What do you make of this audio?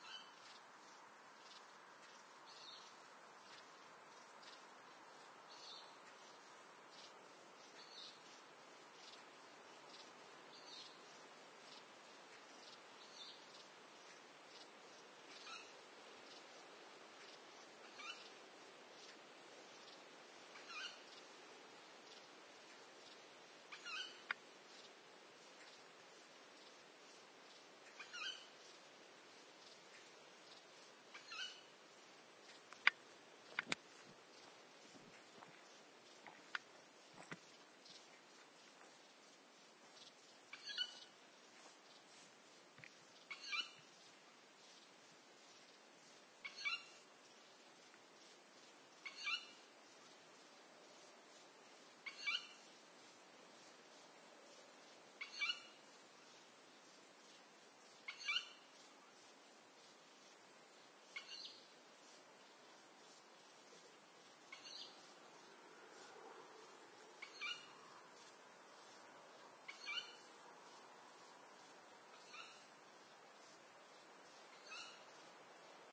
Barn Owl Calls in UK
Barn Owl calling in woodland at night next to quiet road. Recorded using Sennheiser Microphone.
alba, atmos, barn, bird, call, england, europe, field-recording, icuttv, night, owl, screech, tyto, uk, wildtrack